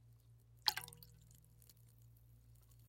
Quick splash, slow quiet pouring into glass